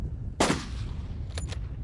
Ruger rifle silenced2
Ruger rifle fired with silencer, windy conditions and poor audio recording. Some noise reduction has been applied.
Audio quality may be poor - recorded from lav mic.
field-recording, gun, rifle, shooting, sniper, weapon